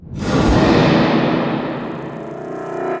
Fight intro
Music intro for the final boss fight - classic 90s style video-game
arcade, console, final-boss, games, video-games